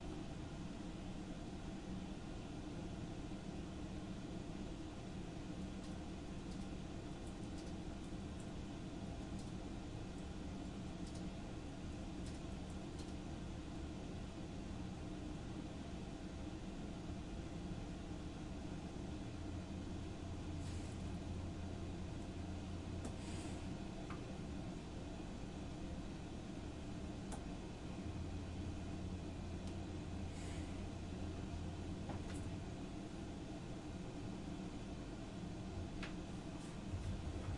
A computer lab with somebody quietly typing roomtone recorded with a Tascam DR-40